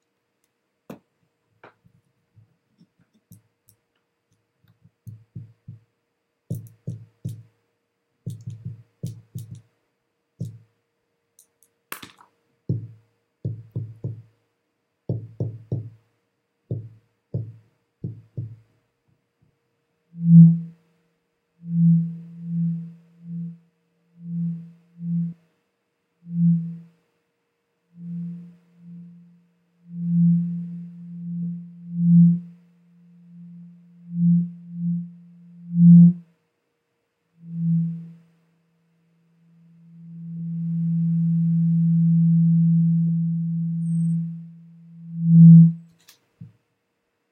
mic tap+mid larsen
microphone tap with ambient sound and mid frequency larsen effects.
Recorded with Rode NTG2 connected to Motu Ultralite MK4
record, microphone, studio, ambient, larsen, mic, frequence, field-recording